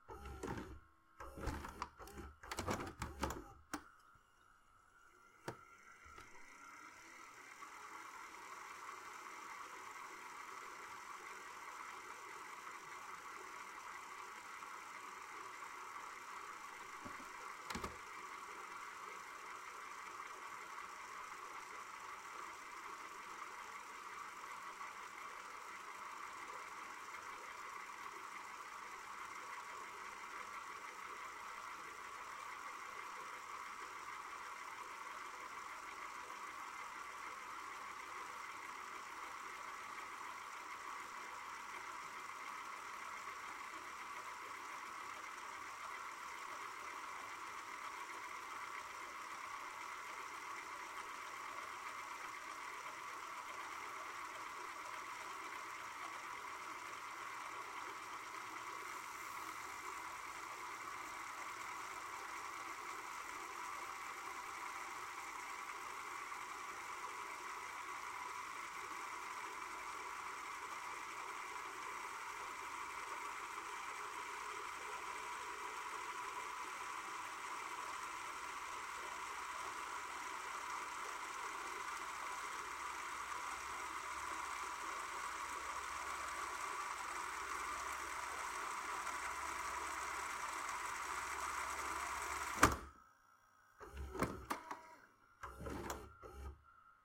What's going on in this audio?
vcr rewind
my vcr when rewinding
rewind, fastforward, vcr, vcr-rewind